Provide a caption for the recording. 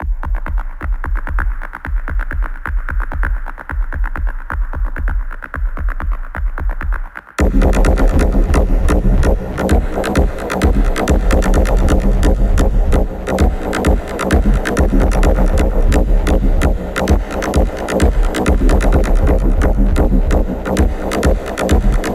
Pulsing Rhythm
A wobbly, echo-y sort of drumbeat. Made with Harmor and heavy post processing in FL Studio.